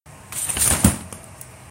open the umbrella
The sound of opening the umbrella.
Recording by MIUI HM 1W.